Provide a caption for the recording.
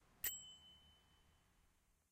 This sound is produced by a byke's bell. In particular, the sound given by the service "Bicing" at Barcelona. It is a typical byke's bell which sounds clearly high with a long reverberation. This sound has been recorded in UPF's entrance in Poblenou's campus.
byke, campus-upf, UPF-CS13